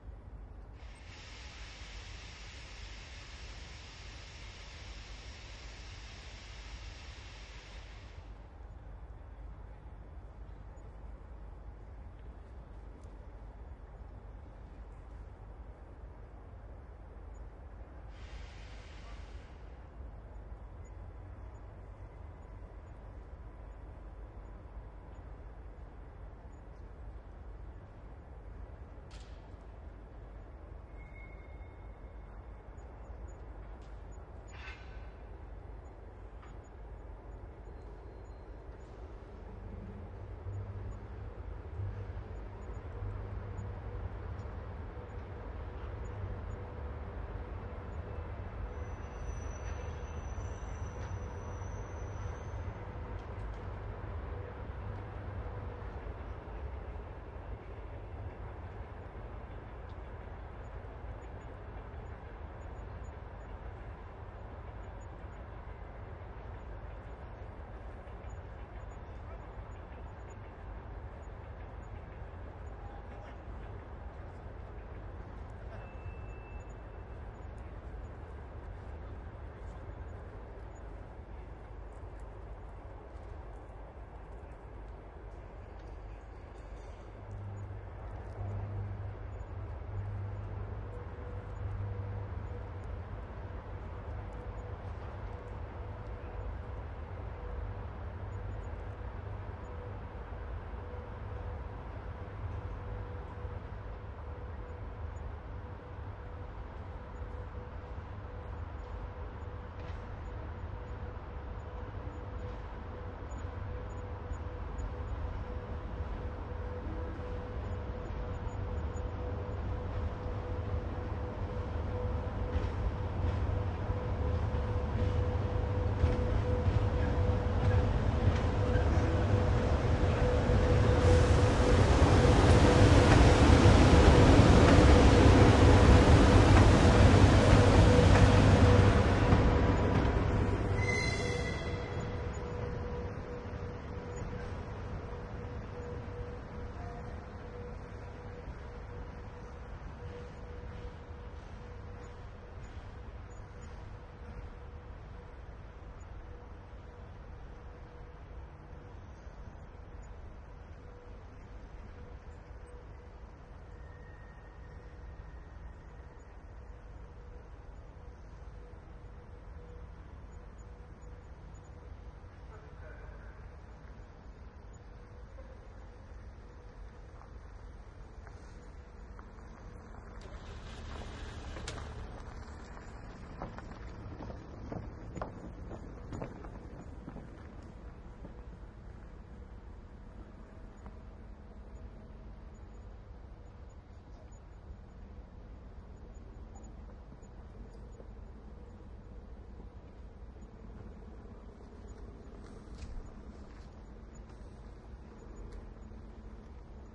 cl yard ambience loco pass by
locomotive passes slowly. environment classification yard
railway, yard, locomotive, classification